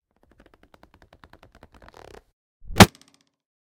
Regular wooden bow